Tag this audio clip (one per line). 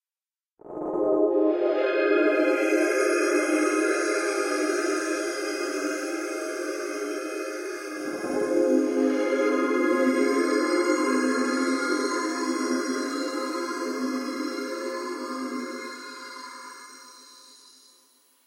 euphoric
soft
electronica
far
spacey
warm
atmospheric
chillwave
pad
ambience
chillout
melodic
calm
polyphonic
distance